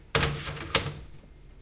You can hear as someone hangs up a telephone abruptly. It has been recorded at the Pompeu Fabra University.